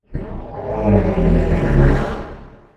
Beast deep groan
A modified version of a lions groan.
Sound by:
animal, beast, creature, dinosaur, dragon, groan, growl, monster, roar, vocalization